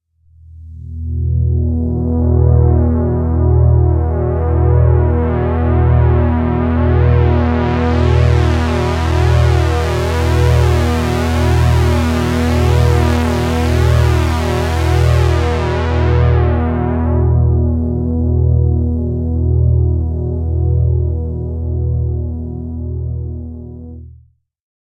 BflatEflat-PulseLong
Pulse made on Roland Juno-60 Synthesizer
80s, effect, Juno-60, pulse, sci-fi, synth